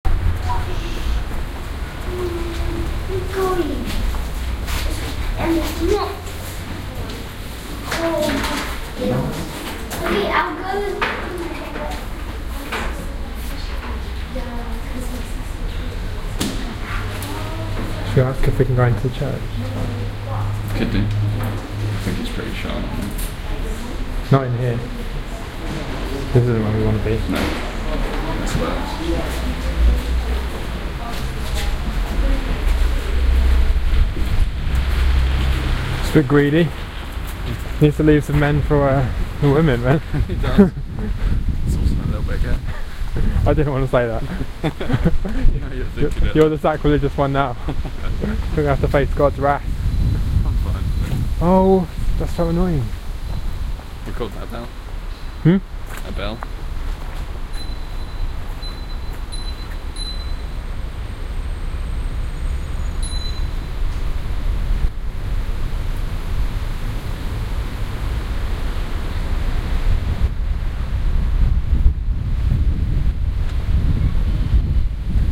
Maida Vale - Bike Bell by Church